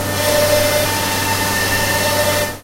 Designa Factory Sounds0009
field-recording factory machines
factory, field-recording, machines